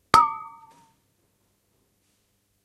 samples in this pack are "percussion"-hits i recorded in a free session, recorded with the built-in mic of the powerbook
boing, bottle, metal, noise, ping, pong, water